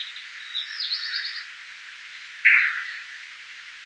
These are mostly blackbirds, recorded in the backyard of my house. EQed, Denoised and Amplified.